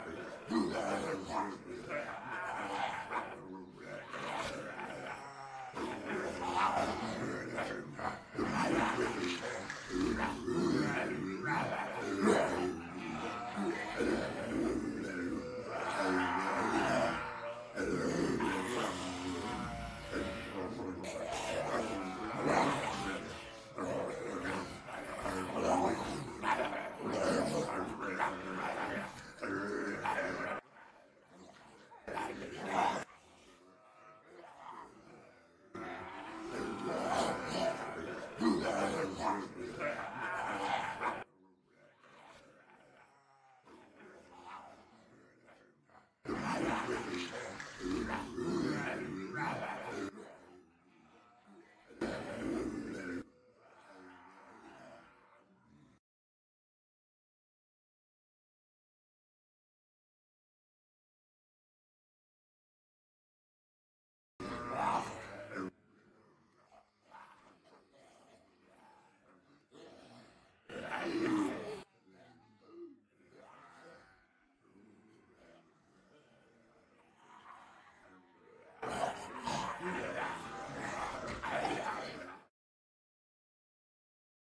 Zombie Group 8A
Multiple people pretending to be zombies, uneffected.